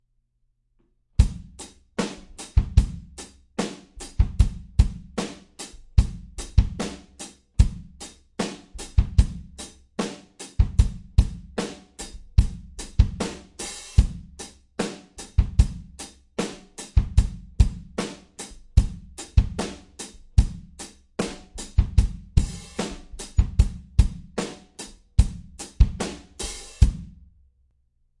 mLoop #1 150BPM
A bunch of hip-hop drum loops mixed with compression and EQ. Good for Hip-Hop.
Snickerdoodle, Hip, Loop, Drum, Hop, 150, Acoustic, Compressed, Electronic, mLoops, EQ, Beats, BPM